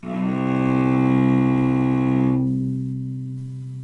A real cello playing its lowest note, C2 (2nd octave on a keyboard) on an open string. First note in a chromatic scale. All notes in the scale are available in this pack. Notes, played by a real cello, can be used in editing software to make your own music.
note, cello, scale, violoncello